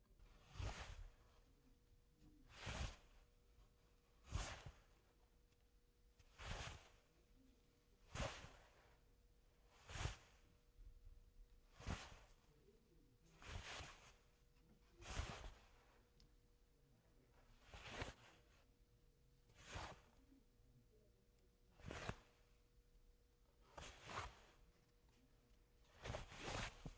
Cloth for foley